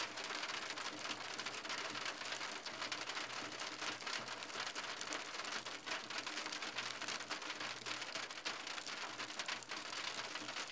Raining on roof
Raining on the roof.
weather, rain, storm